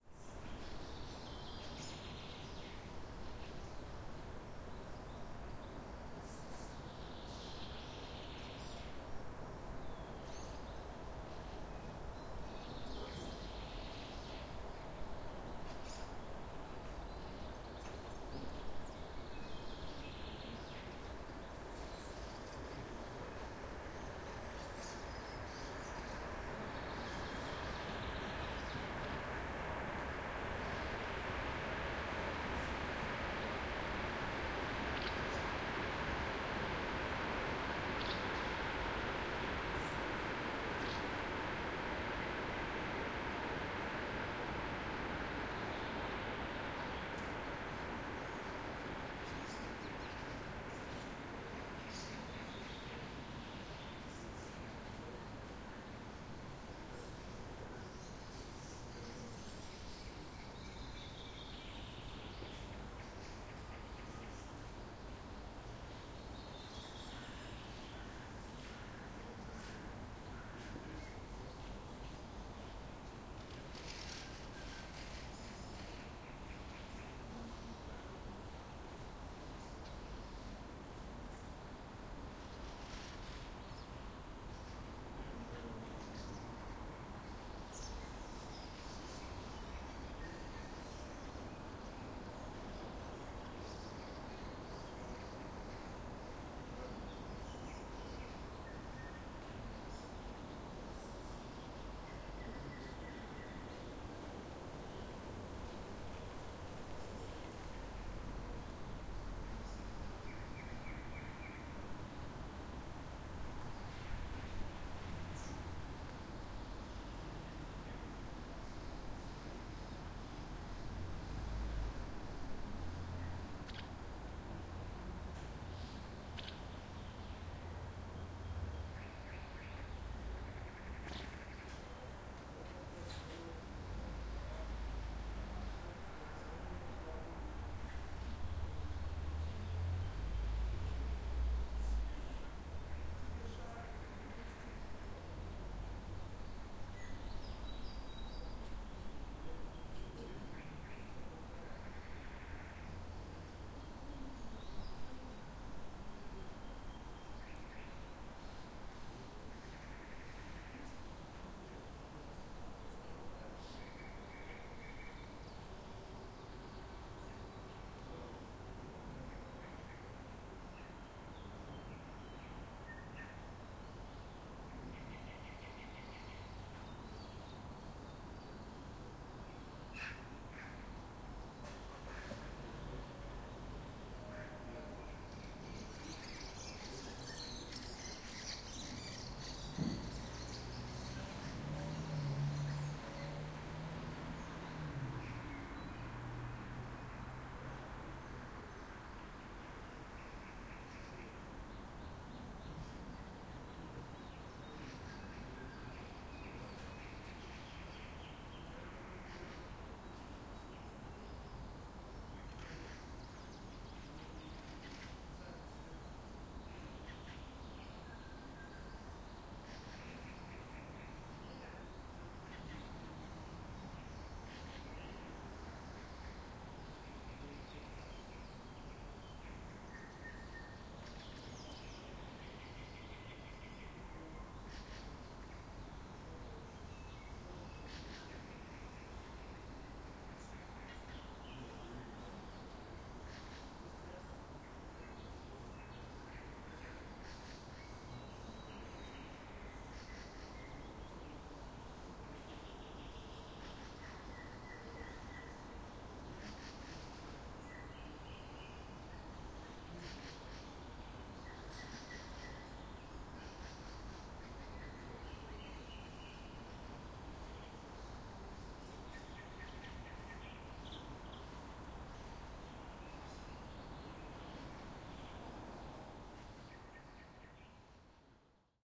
Suburban ambience - Moscow region, birds, distant train pass-by, distant cars, summer XY mics
Suburban ambience - Moscow region, distant train pass-by, birds, distant cars, summer
Roland R-26 XY mics
distant-cars, train, field-recording, distant-train, ambience, atmosphere, Russian, wind, Moscow-region, birds, Russia, suburban, summer